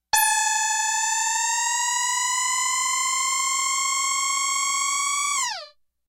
ray gun sound created for science fiction film. created with a minimoog.